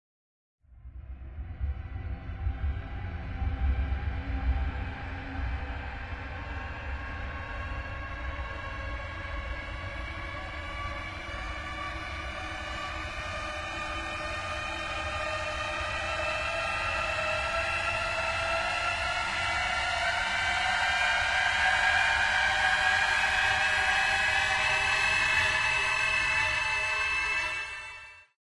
Horror/Suspense Violin
A really cool sound effect to give a spooky feel to your projects.
dark, effect, horror, increasing, joker, knight, riser, risersuck, scary, spooky, strings, suspense, violin